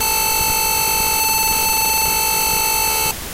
Phone transducer suction cup thing on the radio transmitter for the RC boat.
buzz, electricity, electro, hum, magnetic, transducer